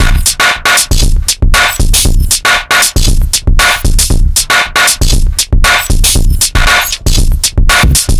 abstract-electrofunkbreakbeats 117bpm-dasnareverse
this pack contain some electrofunk breakbeats sequenced with various drum machines, further processing in editor, tempo (labeled with the file-name) range from 70 to 178 bpm. (acidized wave files)
abstract, beat, breakbeats, dance, distorsion, drum, drum-machine, electro, elektro, experiment, filter, funk, hard, heavy, loop, percussion, phad, reverb, rhytyhm, soundesign, techno